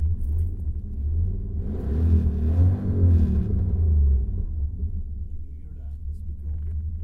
This is a great recording of my grandfather revving up his old 1980 transam. It is truly a beast! The deepness of the stereo will truly show it. Recorded with a plextalk ptp1.